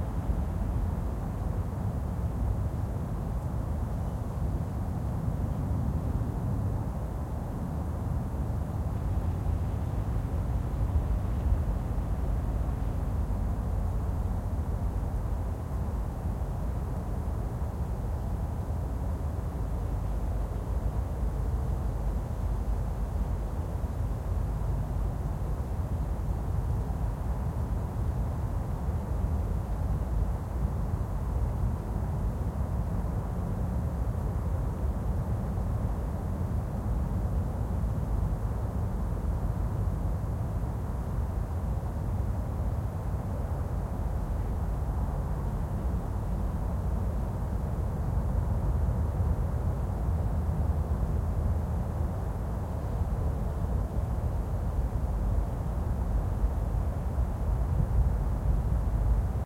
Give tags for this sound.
traffic; Texas; ambience; field-recording; background; night

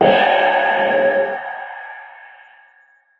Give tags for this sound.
short; beat; industrial; drum-hit; processed

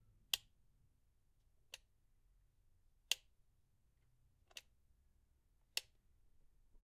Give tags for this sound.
OWI; On-Off; Light-Switch; Switch

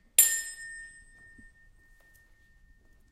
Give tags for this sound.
bell; doorbell; waiter

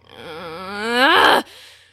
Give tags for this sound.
groan; frustrated; crescendo

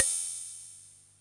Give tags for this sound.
analog; collector-synth; drum-machine; drum-synth; korg; Mini-Pops-45; old-synth; percussion; rythm; rythm-machine; vintage; vintage-drum-machine; vintage-drums; vintage-instrument; vintage-synth

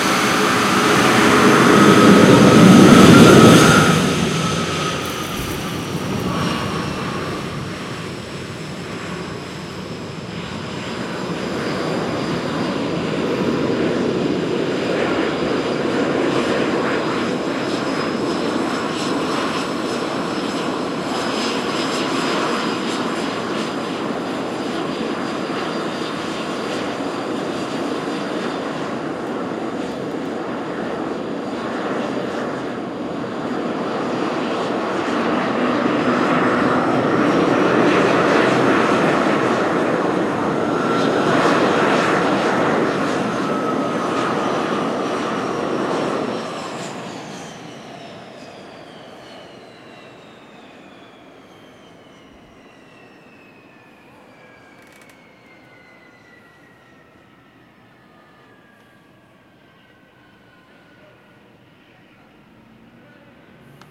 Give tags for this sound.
Aircraft; cut; Engines; Flight; Interior; Jet; off; Plane; Rolls-Royce; Wright

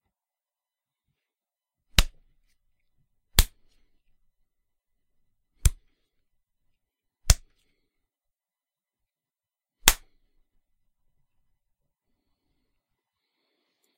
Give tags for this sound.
hit; swosh

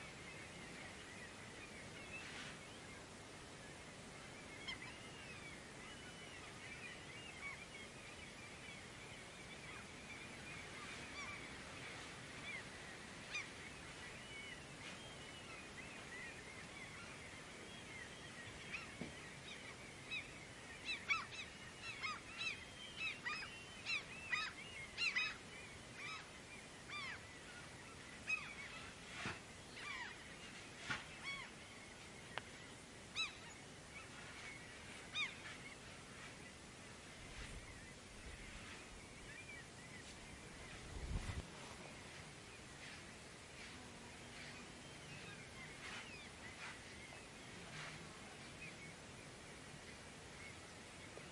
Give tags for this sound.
Sea; Atmosphere

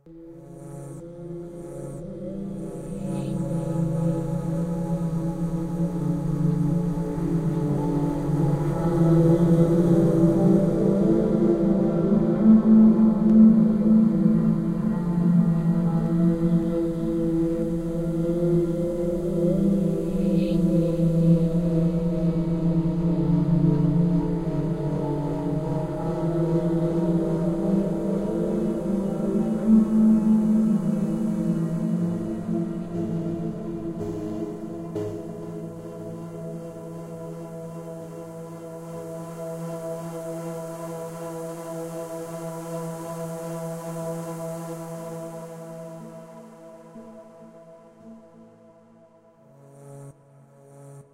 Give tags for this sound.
bell; processed; ghost; religion; electro; guitar

